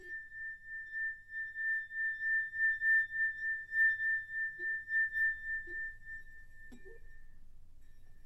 Crystal Bowl A4 1
Rubbing a slightly wet crystal bowl. Tuned in A4. Esfregando a taça de cristal umedecida. Afinada em A4.
crystal, glass, bowl, vidro